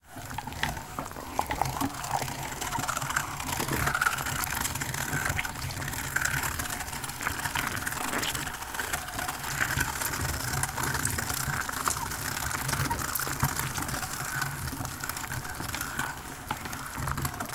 Warm flesh in a mechanical meat grinder(Eq,lmtr)
The sound of a mechanical, old meat grinder grinding ... flesh. In fact, there were boiled mushrooms. Yummy. Thus, not a single living thing was harmed during this recording. My stomach too.)))If it does not bother you, share links to your work where this sound was used. Recorded on a portable recorder Tasсam DR-05x
effects, game, violence, suspense, flesh, crunch, squish, slime, gore, squelch, movie, horror-fx, creature, bone, fx, blood, foley, meat, thrill, fear, sinister, zombie, cinematic, meat-grinder, film, monster, spooky, scary